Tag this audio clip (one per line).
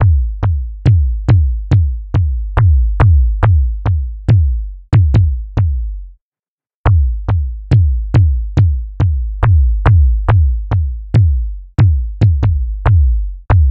140bpm
beat
drumloop
techno